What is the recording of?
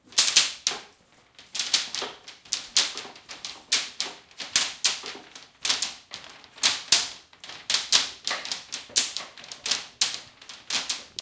Metallic Clicking Various
Bang, Crash, Plastic, Steel